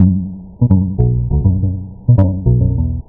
bass weird
acoustic guitar with in-the-hole pickup.
recording slowed down and two octaves lower.
source for drone uploaded the same day to here.
guitar, acoustic, manipulation, snippets